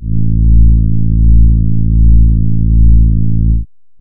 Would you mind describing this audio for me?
Warm Horn A1
An analog synth horn with a warm, friendly feel to it. This is the note A in the 1st octave. (Created with AudioSauna.)
synth, warm